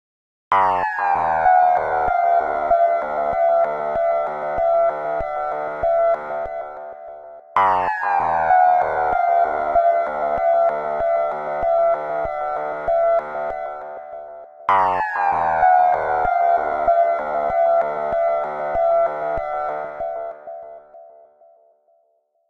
Chopper Synth Auto Focus
Simple pattern in 4/4. Using Chopper Synth to come up with an otherworldly sound.
synth, electro, techno, electronic